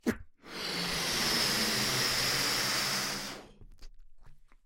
Balloon Inflate 6
Recorded as part of a collection of sounds created by manipulating a balloon.
Balloon, Blow, Breath, Inflate, Machine, Plane, Soar